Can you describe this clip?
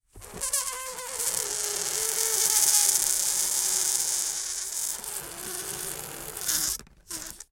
Balloon - Deflate 10
Pressure, Gas, Balloon, Air